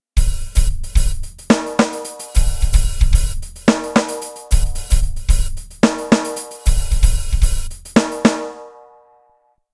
Latin Drum Break
A drum break built on a clave played between snare and kick with a strong hi-hat 16th pattern
drum, breaks